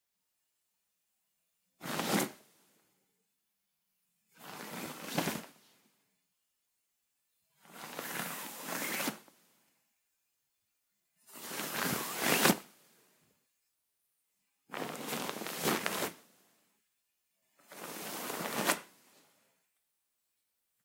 Strokes over Blanket
Several strokes over a blanket with a wooden stick.
stroke, cover